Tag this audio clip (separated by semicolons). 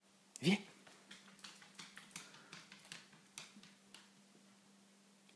interior; walk; int; Gedeon; dog; teckel; call; dachshund; moderate-speed; approching